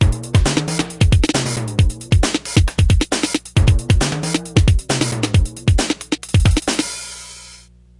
NuskBr 135 bpm
created from my emx-1.
emx-1, loop, 135bpm, hardware